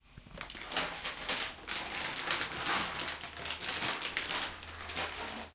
Stereo recording of a cat's food bag being open and then serving